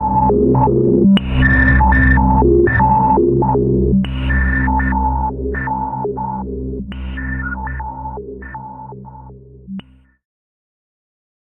Broken Transmission Pads: C2 note, random gabbled modulated sounds using Absynth 5. Sampled into Ableton with a bit of effects, compression using PSP Compressor2 and PSP Warmer. Vocals sounds to try to make it sound like a garbled transmission or something alien. Crazy sounds is what I do.
ambient, artificial, atmosphere, cinematic, dark, drone, electronic, evolving, experimental, glitch, granular, horror, industrial, loop, pack, pads, samples, soundscape, space, synth, texture, vocal